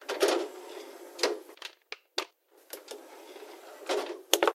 disc to cd player and press play
Putting a CD into player and press play. Recorded with Olympus LS 10.
Compact, case, cd, disc, handling, player